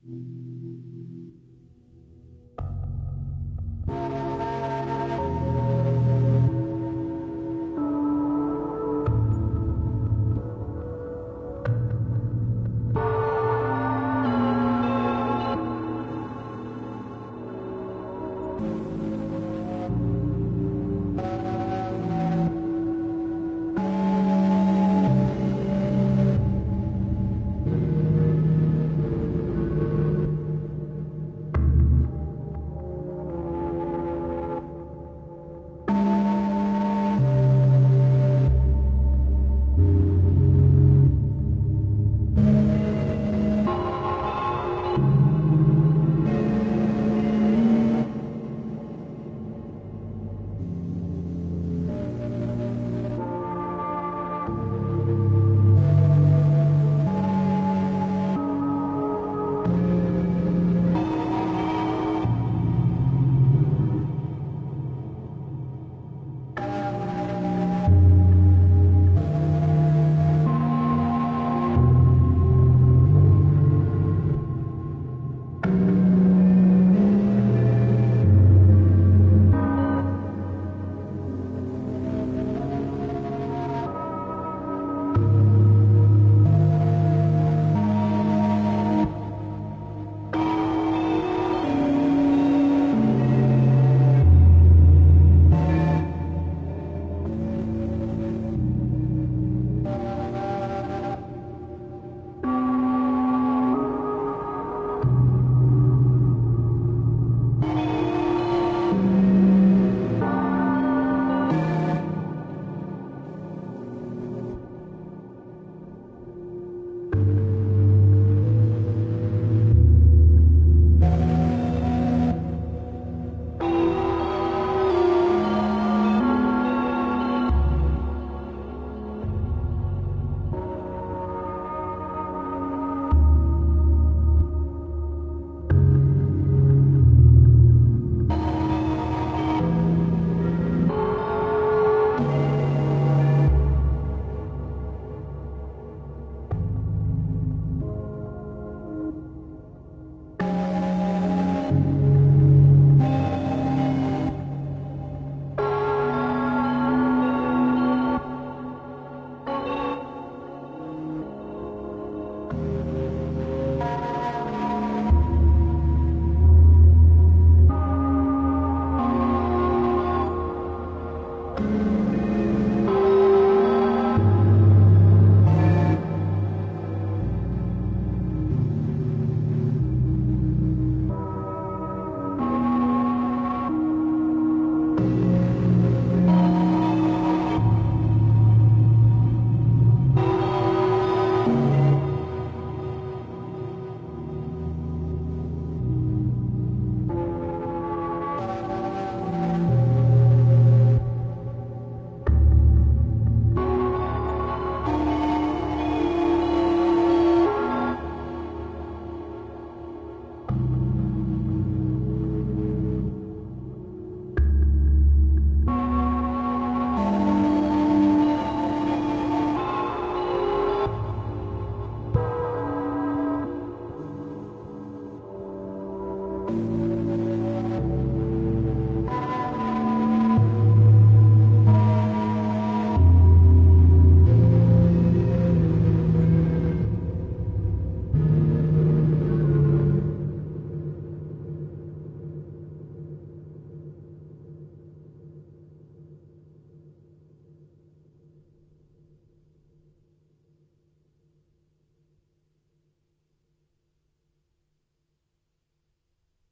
8tr Tape Sounds.
tape, artistic